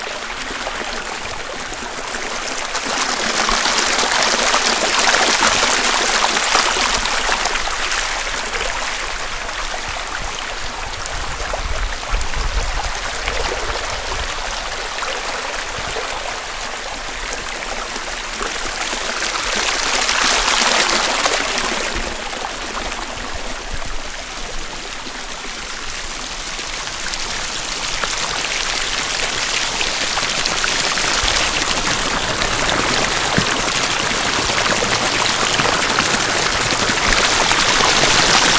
Recorded in Bangkok, Chiang Mai, KaPhangan, Thathon, Mae Salong ... with a microphone on minidisc
machines, street, temples, thailand